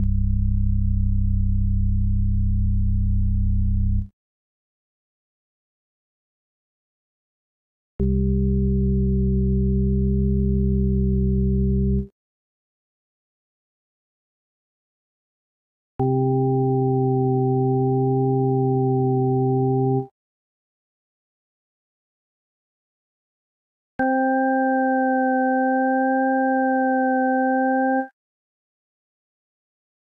EVOLUTION EVS-1 PATCH 009
Preset sound from the Evolution EVS-1 synthesizer, a peculiar and rather unique instrument which employed both FM and subtractive synthesis. This sound, reminiscent of a melancholy Hammond organ, is a multisample at different octaves.